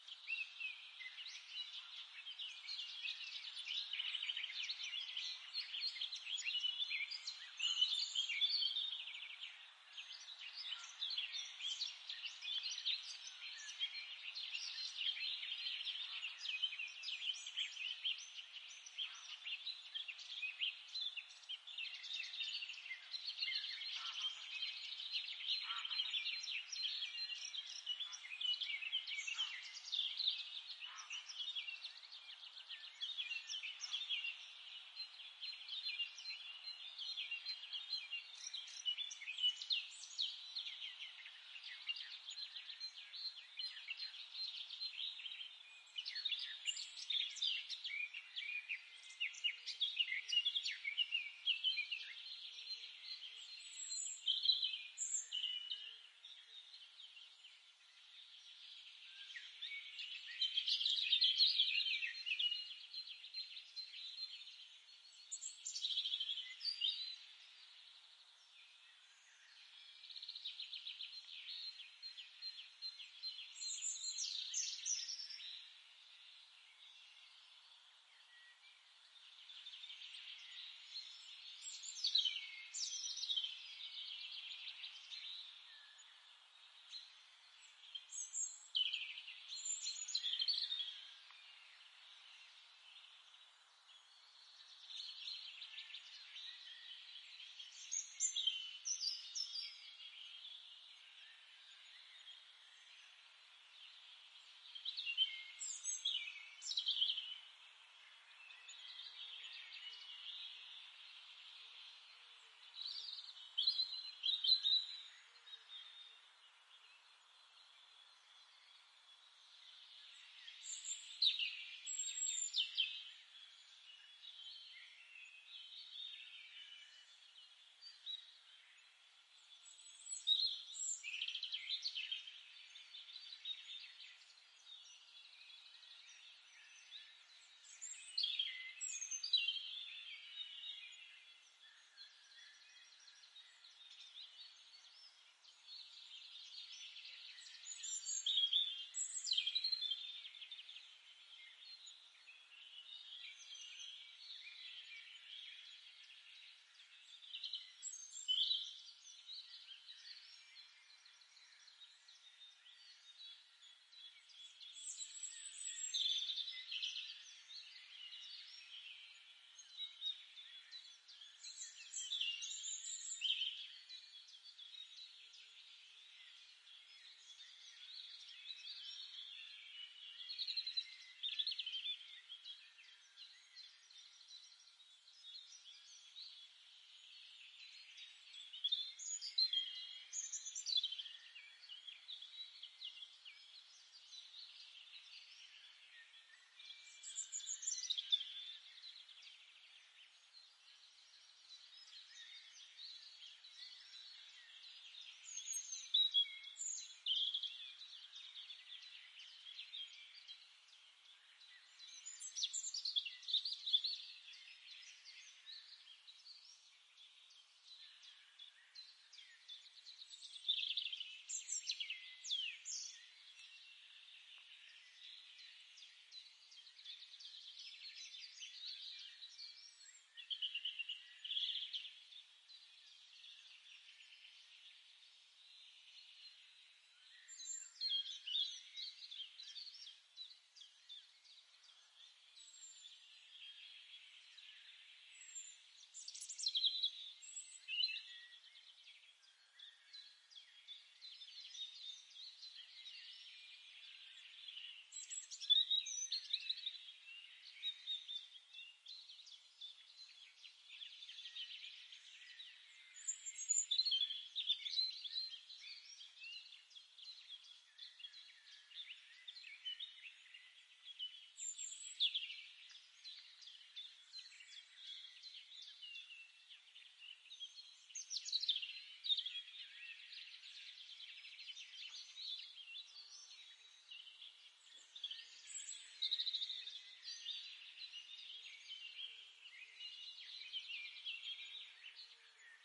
A binaural recording of birds singing in the woods. Recorded at the 'Utrechtse heuvelrug' in the Netherlands. Gear used: Roland CS-10em binaural microphones, Zoom H4n field recorder. Processing: slight noise reduction (9dB) using iZotope RX7, high pass filter to remove unwanted rumble (Cubase 7.5), made into a loop.